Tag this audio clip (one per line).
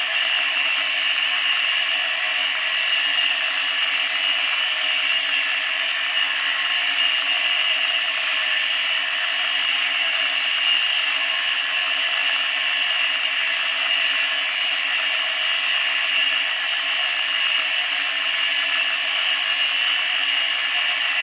ambience
atmosphere
soundscape